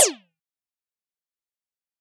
Lazor-Short-Mid1
A cheesy laser gun sound. Generated using Ableton Live's Operator using a pitch envelope and a variety of filtering and LFOs.
weapon, sci-fi, shoot, zap